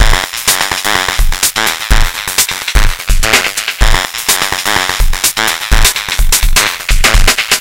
abstract-electrofunkbreakbeats 126bpm-flamektro
this pack contain some electrofunk breakbeats sequenced with various drum machines, further processing in editor, tempo (labeled with the file-name) range from 70 to 178 bpm. (acidized wave files)
abstract, beat, breakbeats, click, dance, distorsion, drum, drum-machine, electric, electro, elektro, experiment, fast, filter, funk, glitch, hard, heavy, loop, percussion, phad, processed, reverb, slow, soundesign, syncopate, techno